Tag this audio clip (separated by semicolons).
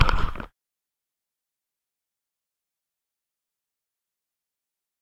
bump; click; hit; mic